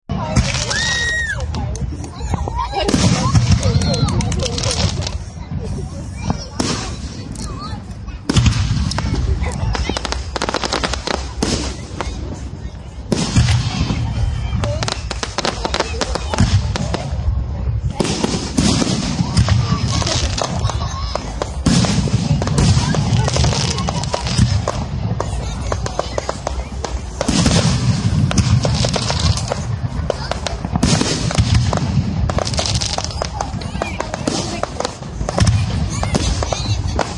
Wichita Riverfest Fireworks 1
Stereo recording of crowd and fireworks recorded May 31, 2013 at the opening celebration of the 42nd annual Wichita Riverfest.
Audio taken from video footage recorded with an HTC Rezound.
fireworks, Wichita, crowd, sfx, people, Kansas, field-recording, outdoors, ambience, celebration, stereo